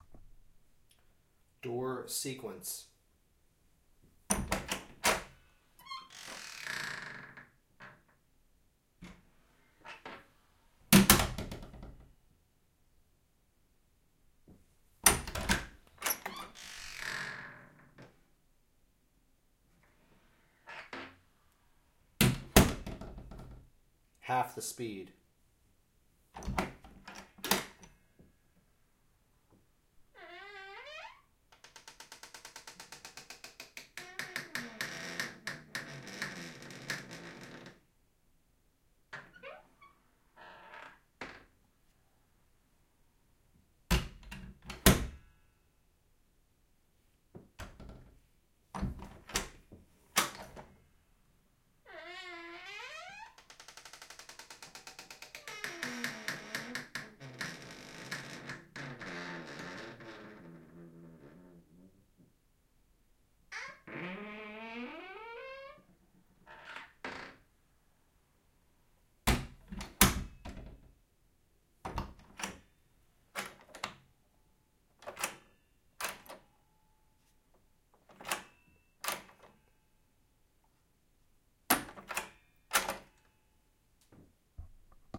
AAD CREAKY DOOR SEQUENCE
I set up a Zoom H6 about 12 inches away from an heavy old closet door with an ancient door knob.
-Keith Nolan
p.s. This was recorded as a Mid Side stereo.
creak, Creaky, creepy, door, doorknob, drama, eerie, haunted, hindges, hinge, horror, knob, old, scary, spooky